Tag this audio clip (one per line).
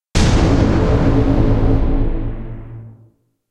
trailer
title
effect
hit
cinematic
request
inception
horns
horn
movie
metal
impact